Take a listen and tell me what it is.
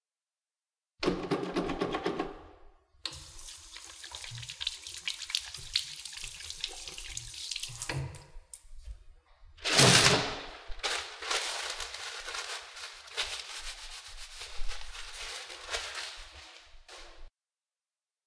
hand washing paper towel trashing